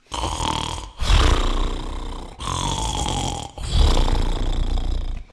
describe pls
A extreme snoring while sleep